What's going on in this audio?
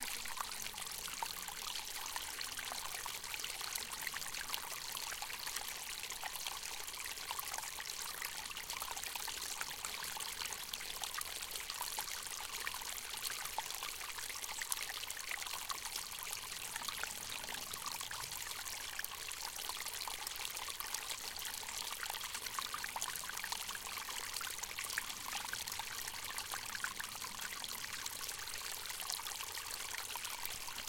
small stream
Recording in the Thuringian Forest in 2018 with the Tascam DR-05
Software ADOBE Audition CS6